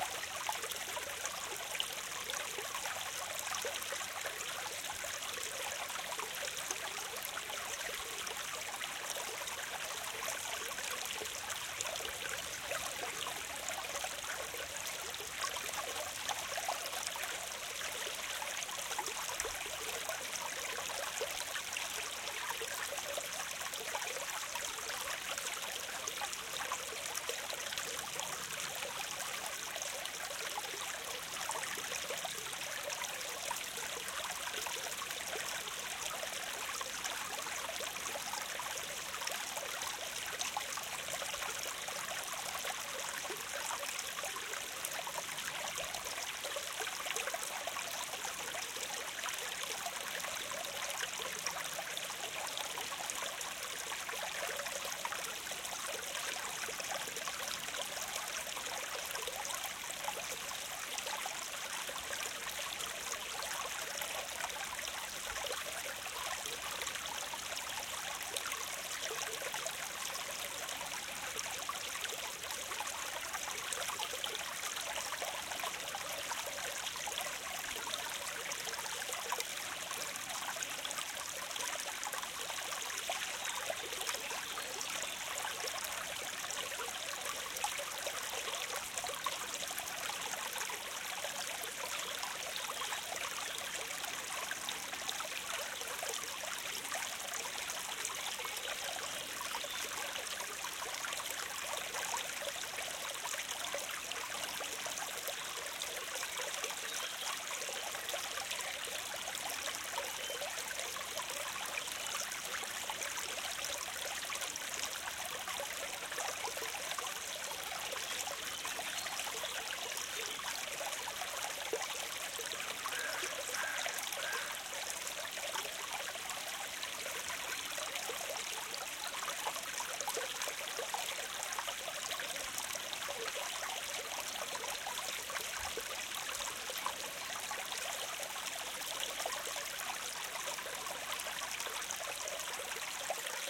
small stream forest
a small water stream in a forest. recorded on a nice summer day in the Ardennes/Belgium.
EM172 binaurals-> Battery Box-> PCM M10.
nature, Belgium, stream, binaural-recording, creek, forest, stereo, woods, small, Ardennes, binaurals, water, field-recording, brook, water-stream